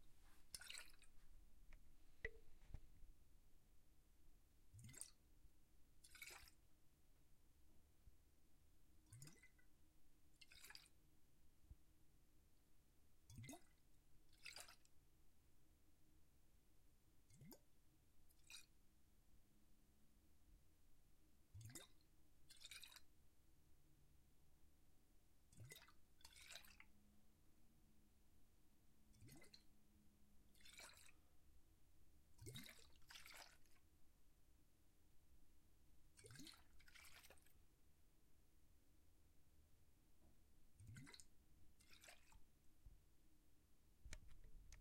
Wine Bottle
The sound of tilting a half-empty bottle of wine. Used it for a sound effect of someone drinking.
bottle, pouring, wine